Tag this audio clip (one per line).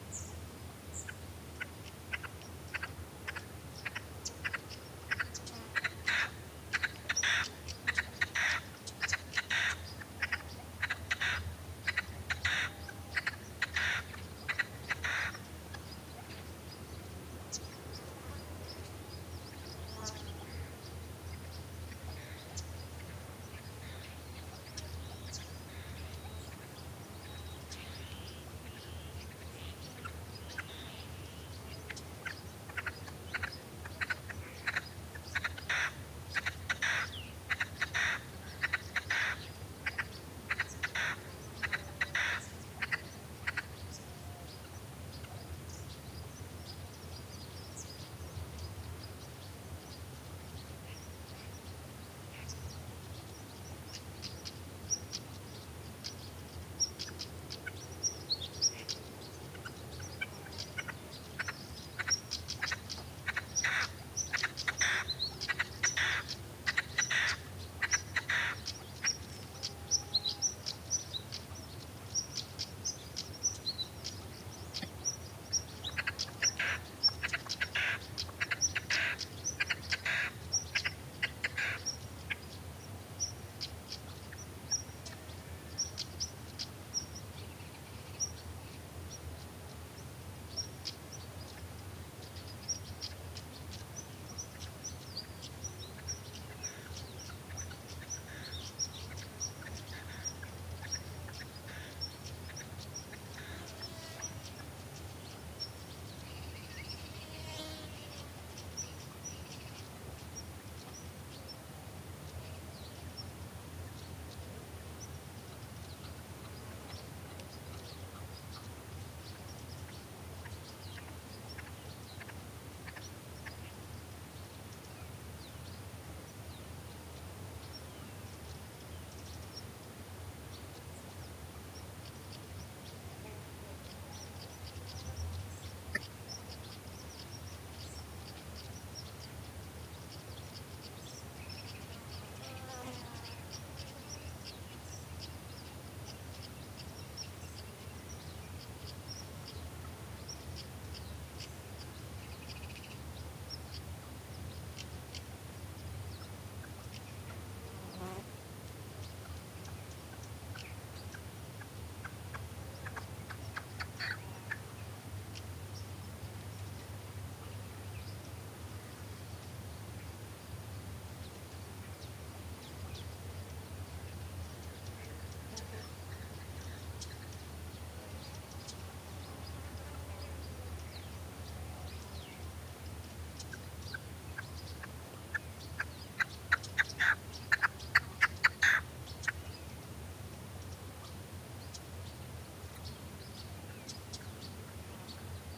red-legged-partridge
summer
south-spain
birds
field-recording
ambiance
nature
donana